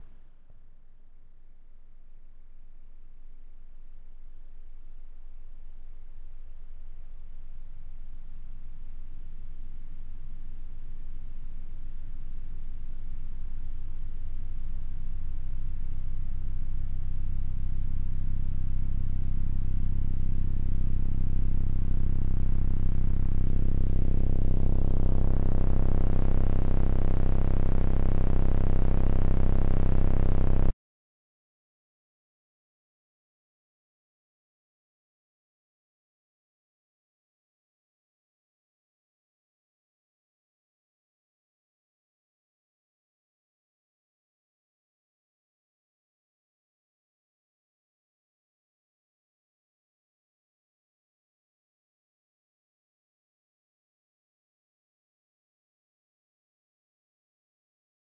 long sweep up fx 4
long sweep up fx usefull for film music or sound design. Made with the synth Massive, processed in ableton live.
Enjoy my little fellows
tension, white, uplifter, sound-design, sfx, ambient, fx, effect, pad, up, noise, long, sci-fi, strange, sweep, electronic, synth, atmosphere